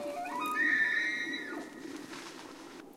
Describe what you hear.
Samples taken of bull elk bugling during the fall rut in Evergreen, Colorado.
Autumn, Elk, Fall, Rut, Bugle, Mating, Bull, Antlers, Hunting, Call